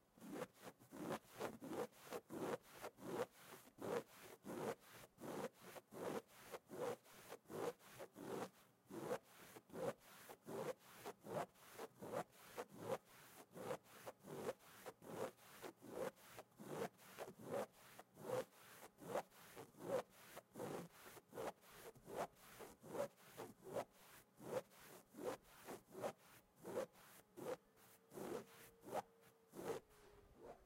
Scratching Couch
Scratching a couch by Constantly dragging fingernails across the fabric.
couch, fingers, human, long, nails, scratches, scratching, sofa